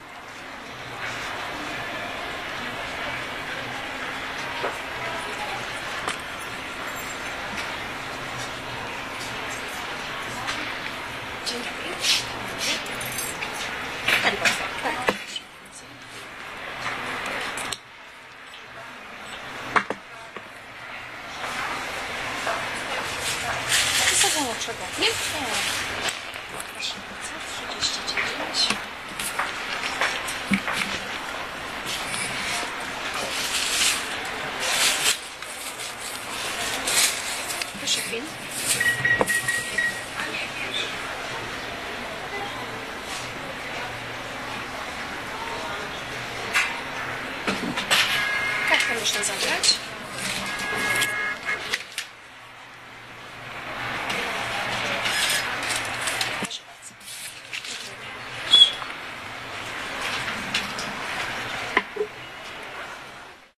buying purse 191210
19.12.2010: about: 19.50. Shoe shop in M1 commercial center in Poznan on Szwajcarska street. I am buying the purse. The sound event.
beeping, buying, clicking, commercial-center, field-recording, shoe-shop, supermarket, voices